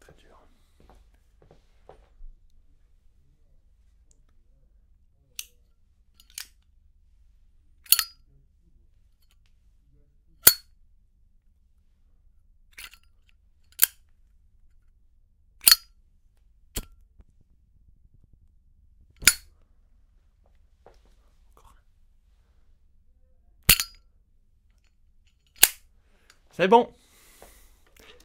Opening, lighting and closing a Zippo
light, metallic, fire, smoker, open, metal, close